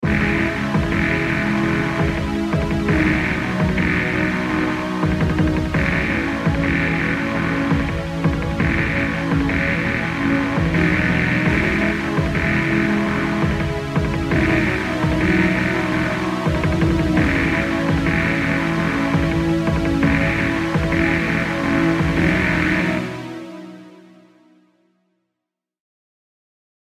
Simple, sad chords with an ambient drum pattern accompanying.
I created this with Logic Pro X and a basic soft synth that come with the software. The drums have some effects from Fabfilter's "Timeless" plugin, and also some distortion, but nothing too fancy.